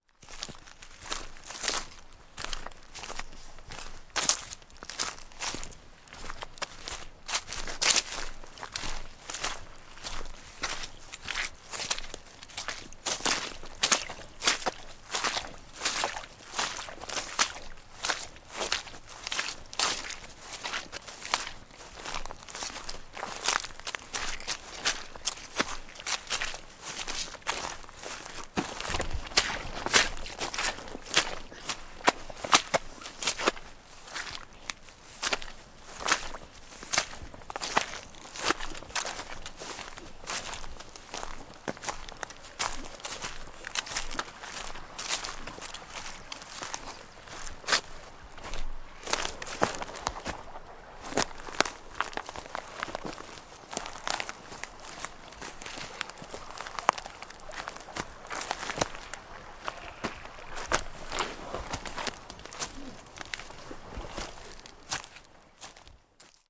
Footsteps Walking Boot Mud-Puddle to some Twigs-Trickling River
A selection of short walking boot sounds. Recorded with a Sennheiser MKH416 Shotgun microphone.